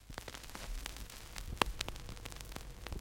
Raw cracking of a vinyl noise of an old record.
I digitized into my via software from a vinyl player.
noise, record, scratch, scratching, turntable, turntablism, vinyl